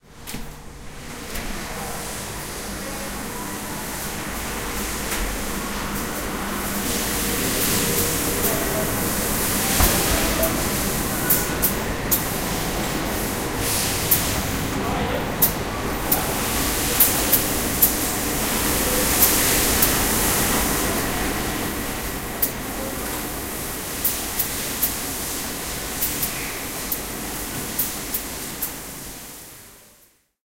SOLA Optical / Carl Zeiss Vision - Lonsdale, Adelaide South Australia.
This is the sound of a lens moulding production line. The pneumatic sounds are part of the automation.